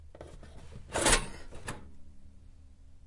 Sound of kitchen toaster